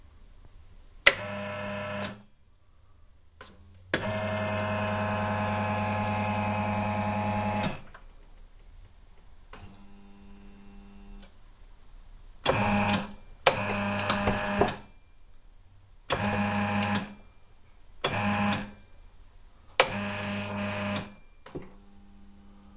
Dental chair servo switch
A recording of a dental chair going up,down,back and forth.Plenty of servo/motor noise and good on/off clunky switch noise.
Motor, Servo